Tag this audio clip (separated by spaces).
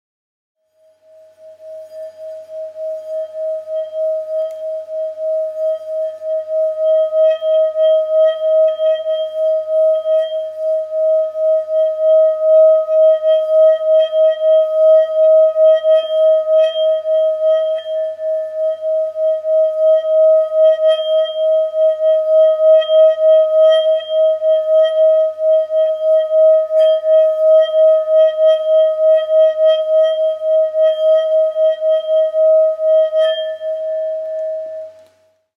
buddhist meditation